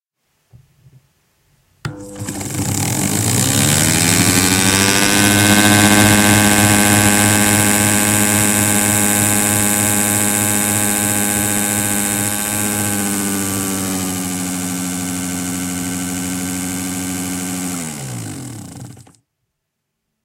Airplane propeller
Simple propeller sound in a cartoon style.
aeroplane, airplane, propeller, flight, aviation, aircraft, a, take-off, plane